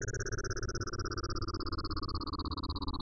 Sequences loops and melodic elements made with image synth. Based on Mayan number symbols.
loop, sequence, sound, space